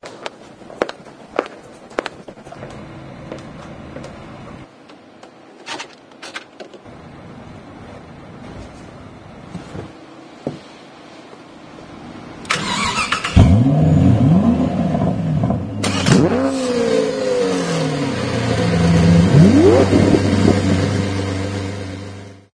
J11 garage general sound
walking with heels into a garage and starting a luxurious car engine
car, garage, luxurious, starting, walking